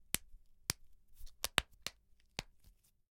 Crackling the finger bones
Relaxing bones. Putting hands together. And sound is produced...
Studio.
Close mic.
bones, crackling, fingers, human